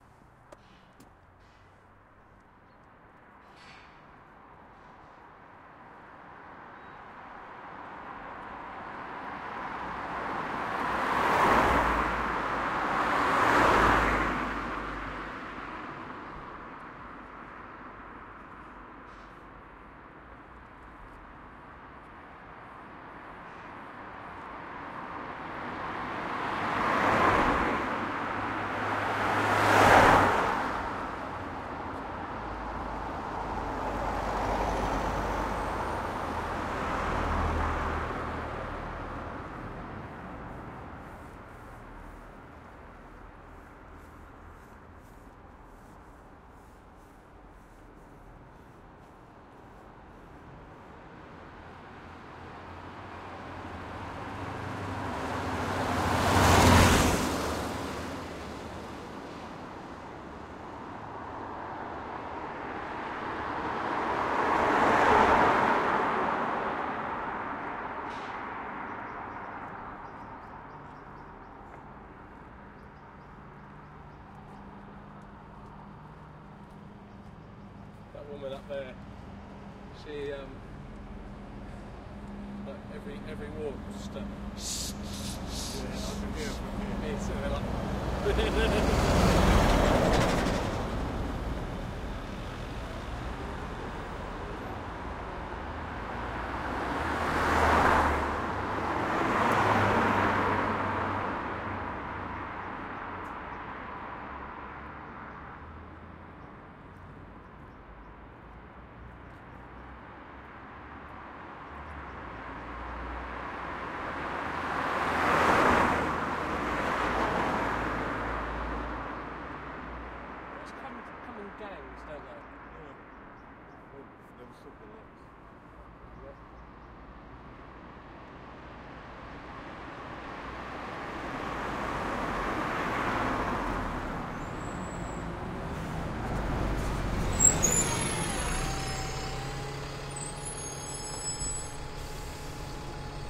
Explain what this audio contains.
Car Bys London Greenwich 02 16Feb2013
This is a raw recording of car bys in London, UK. It'll need an edit and clean up for use.